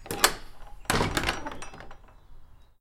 Door, Church, Close, E
Raw audio of closing a wooden church door with a large metal beam lock.
An example of how you might credit is by putting this in the description/credits:
The sound was recorded using a "H1 Zoom recorder" on 8th March 2016.